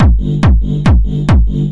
Reversed hardstyle bass. 1 of 4